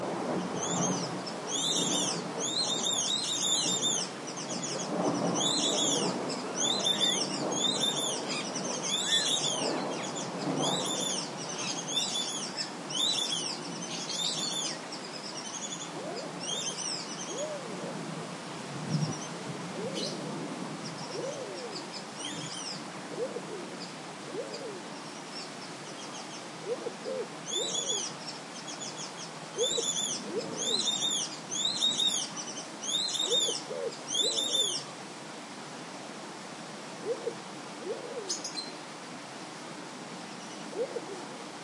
white faced whistling duck
Calls from several White-faced Whistling-ducks. Recorded with a Zoom H2.
aviary, bird, birds, duck, exotic, jungle, rainforest, tropical, zoo